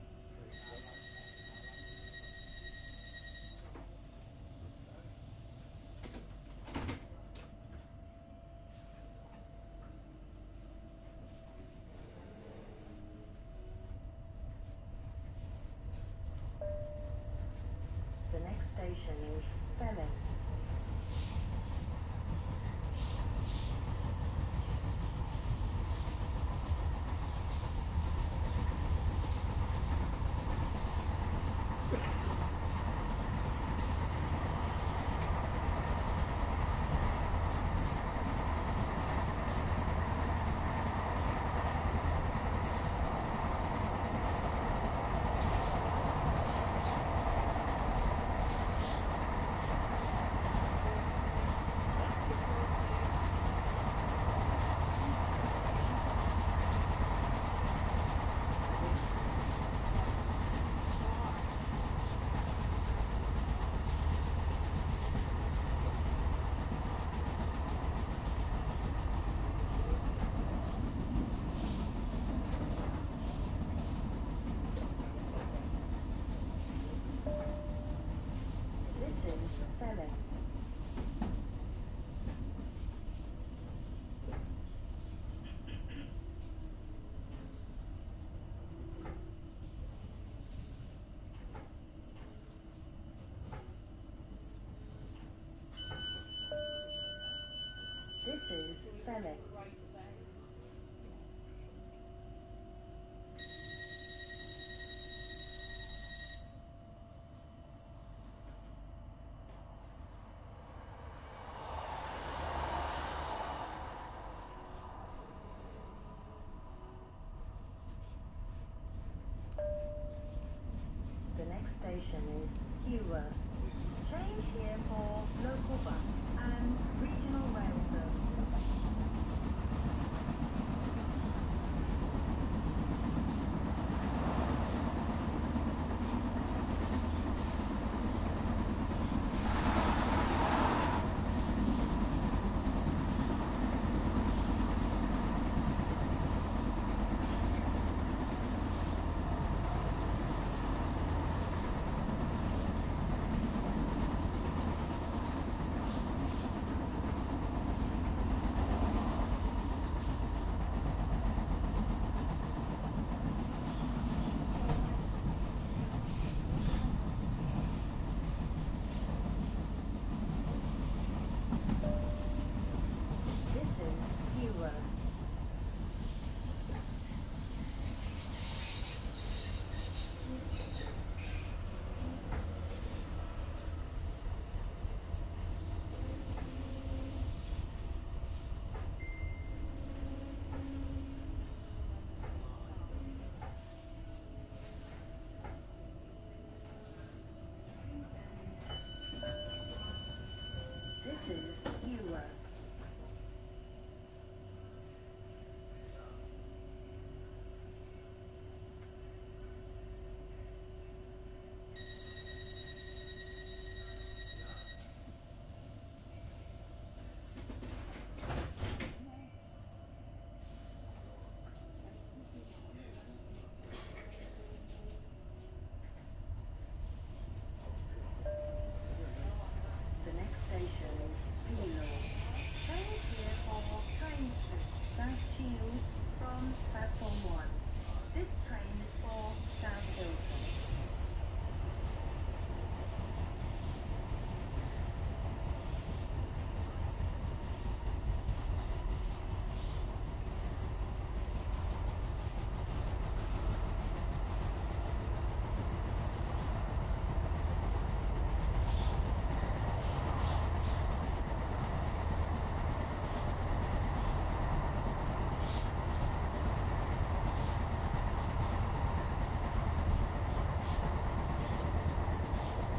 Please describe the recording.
interior train underground subway metro overground several stations
interior, overground, field-recording, underground, subway, light-rail, arrives